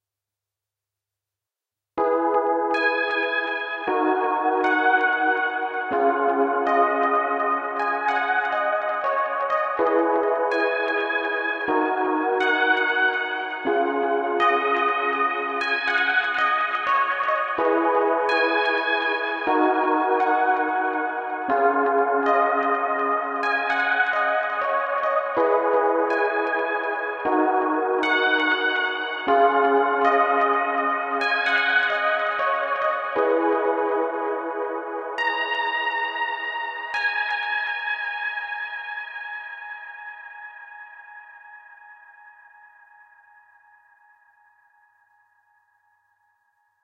DX7 SynthWave Chords
This is a simple sequence with chords - Emaj, D#m and C#maj where I played on my midi keyboard "Novation Lanchkey mini" with using Arturia Vintage collection VST plugin - Yamaha DX7, type - electronic organ and adding delay effect and reverberation.
Sound like synth-wave or retro-wave stile, moderato, quietly and gently. Lounge.
You can be using it for your needs, podcasts, samples, different projects, or just like alarm sound on your phone. Enjoy.
Temp - 123 bpm.
pad, arturia, synth-wave, chords, analog, delay, organ, sentimental, SynthWave, atmosphere, space, Chord, multisample, DX7, virtual-analog, soundscape, electronic, wave, lyrical, melodic, ambient, synth, yamaha, retro-wave, reverberation, music